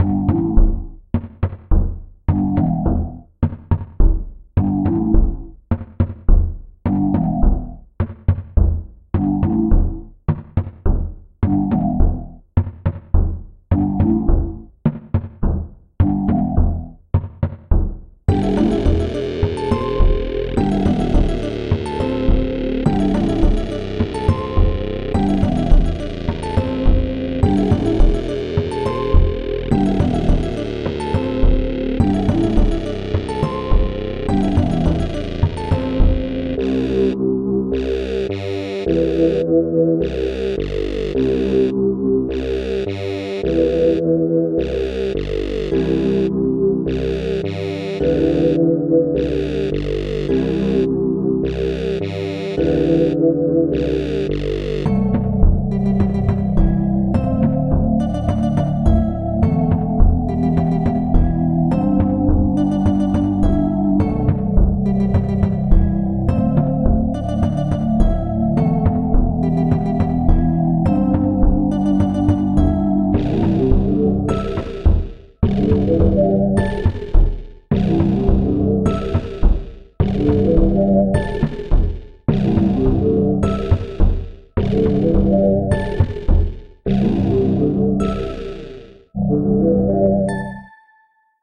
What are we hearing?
You can use this loop for any of your needs. Enjoy. Created in JummBox/BeepBox.